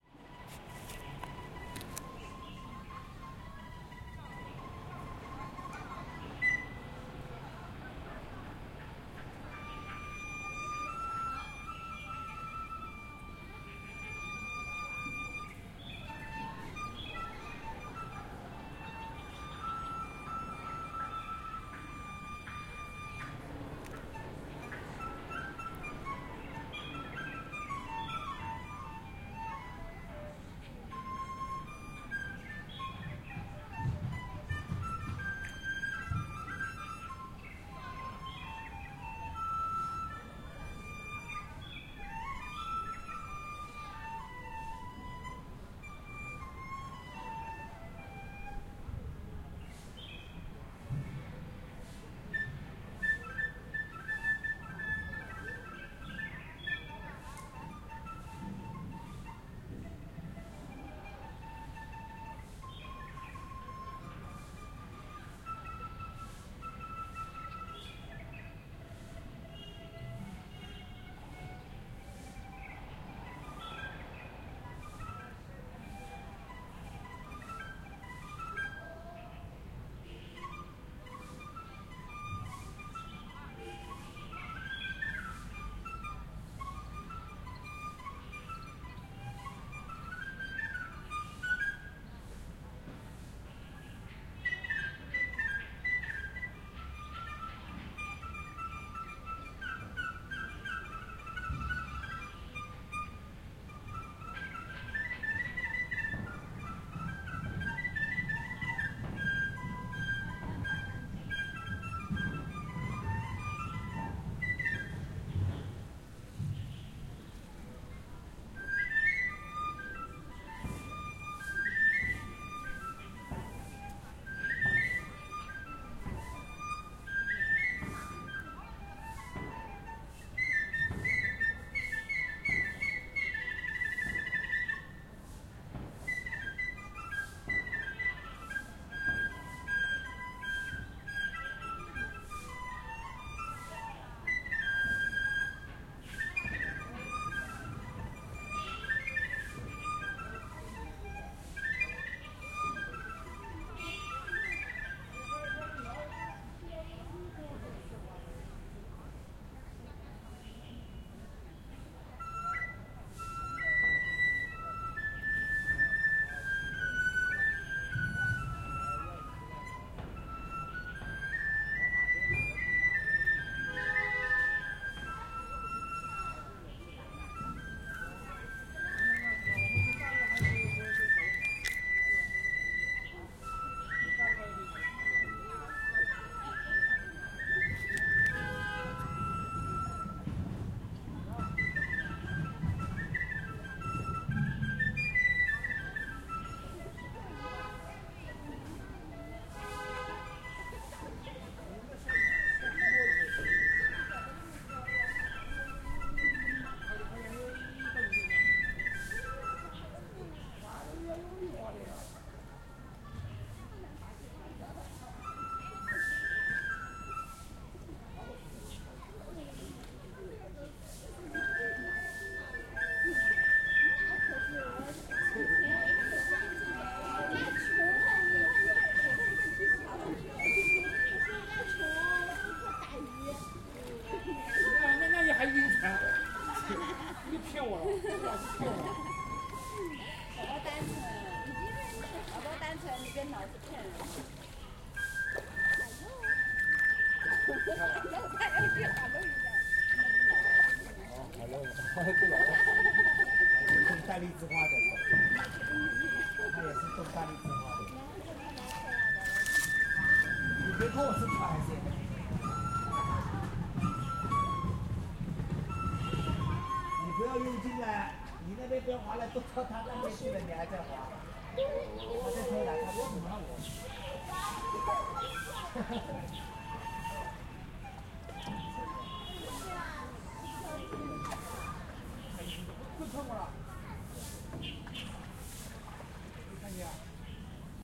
This is another recording from "Old Bell Park" in suburban Shanghai made on a weekday afternoon. This is primarily a recording of an outstanding flautist, but one can also identify the chirping of birds along with the sounds made by people sweeping the path. A small metal boat piloted by tourists can be heard bumping into the sides of a small, man-made river.